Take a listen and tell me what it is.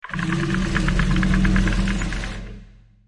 Hybrid Monster Growl
Meant to simulate a large bug monster growling. Made up of 7 different sounds stretched an manipulated.
Bug, Growl, Large, Monster, Snake